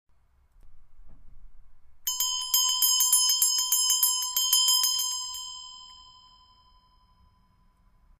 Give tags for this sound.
Bell; ring; ringing

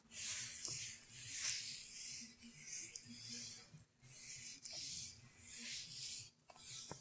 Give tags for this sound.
Creative; Free; Mastered; Edited

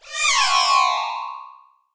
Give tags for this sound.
synth; sound-effect; sci-fi; harmonic-sweep; horror

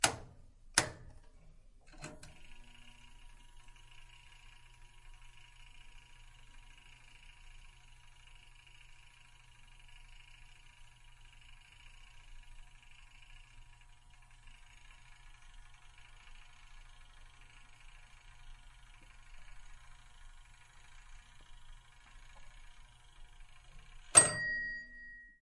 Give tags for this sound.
kitchen; food; oven; cooking

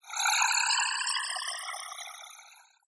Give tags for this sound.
upload; synth; space; image

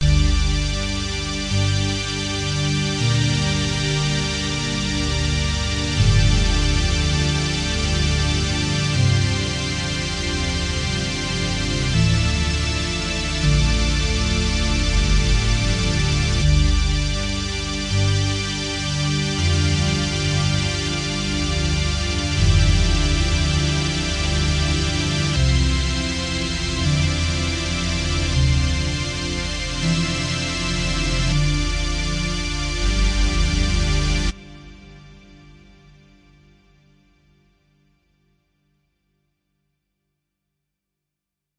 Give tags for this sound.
soundeffects; ambiance; ambiant; sound-effect; sfx; sound; atmosphere; fx; effect

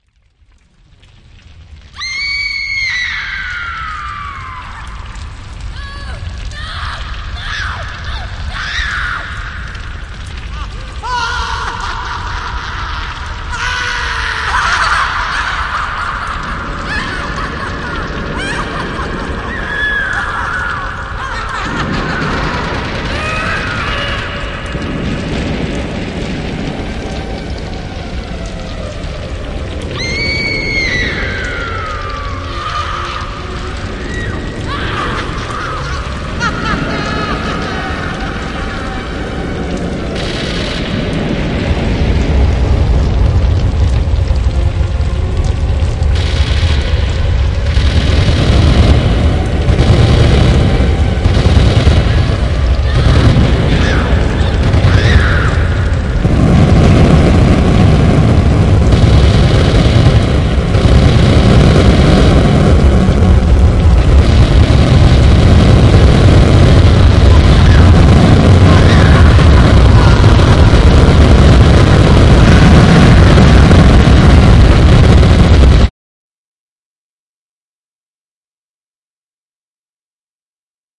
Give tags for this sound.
female; fire; gun; scream; shot; siren

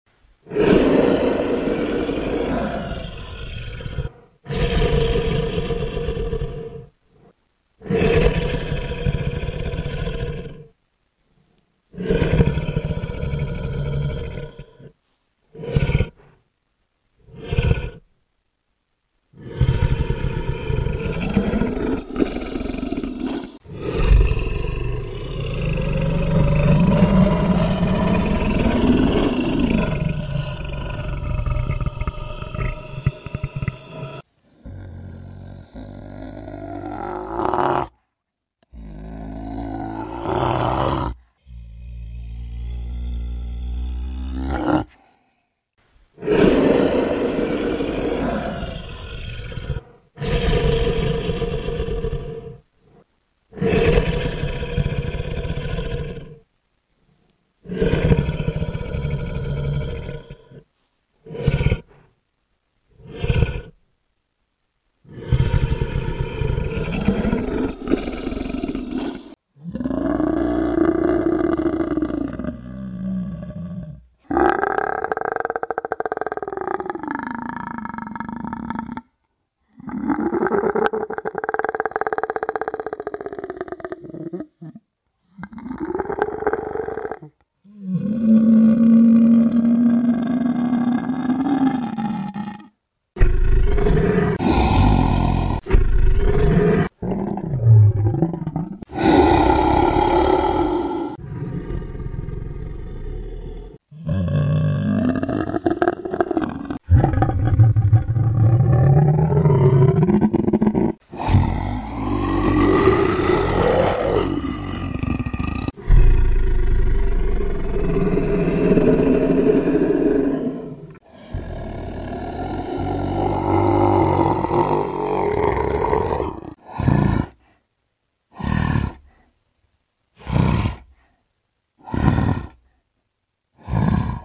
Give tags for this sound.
Animal; corefic; dragon; life; Monster; Scream; varous

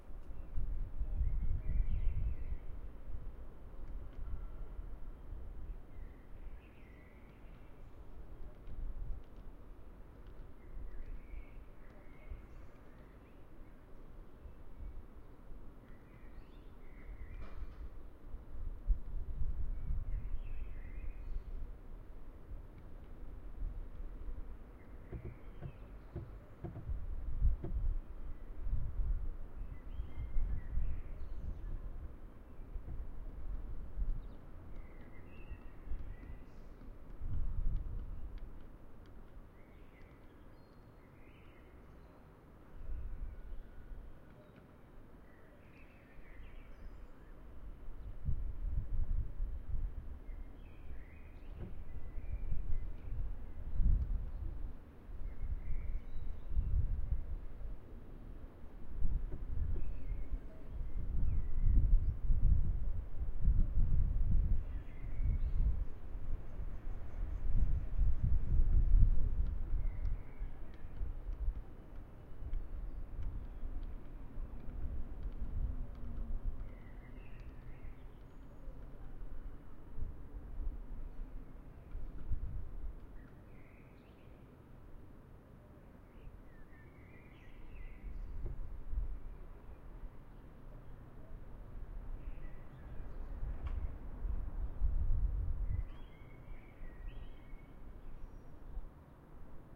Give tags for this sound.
ambience; ambient; atmosphere; background; city; ge; li; spring

